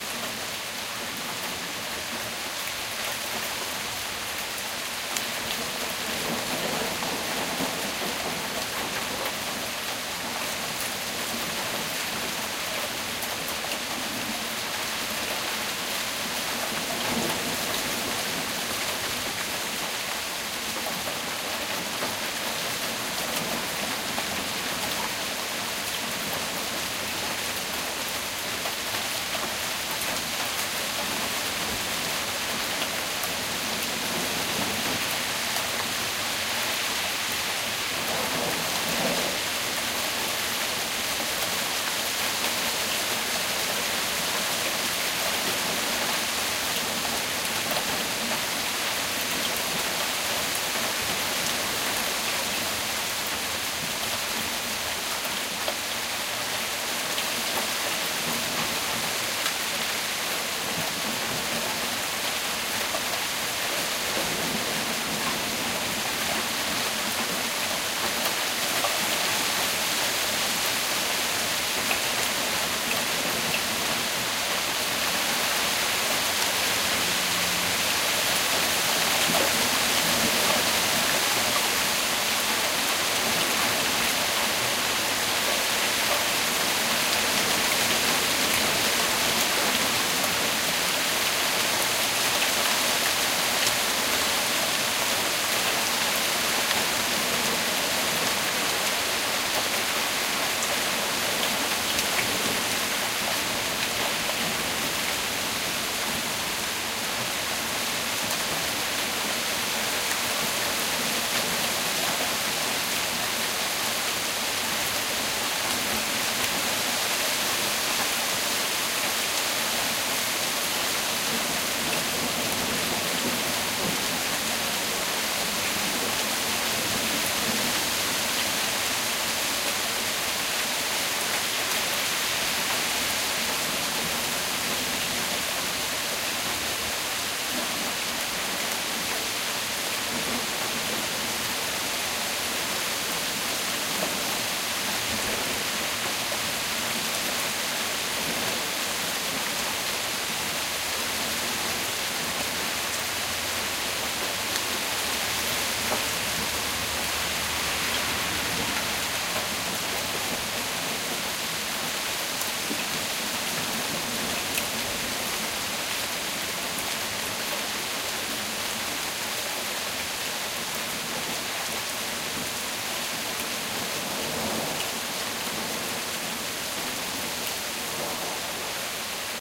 hail light 3min
light hail storm in fort collins colorado